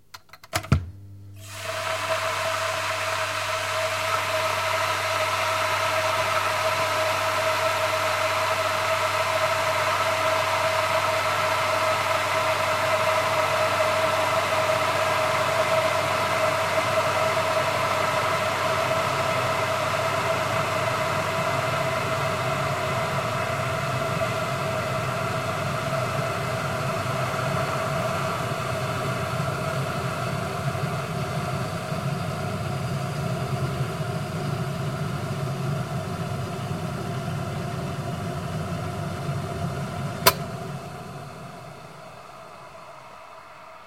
A kettle boiling
boiling, boiling-water, kettle, Kitchen, Tascam